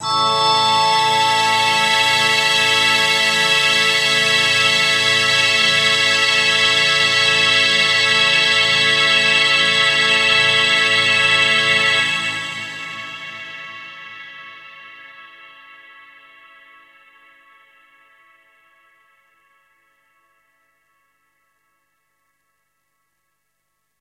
Space Orchestra [Instrument]